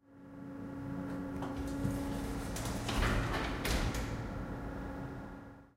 elevator door open 1
The sound of a typical elevator door closing.
Recorded at a hotel in Surfer's Paradise with a Zoom H1.
sliding, elevator, door, lift, closing, open, opening